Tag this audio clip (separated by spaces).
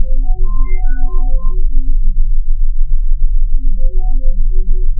fiction odd oddball out peculier science scifi space strange weird whacked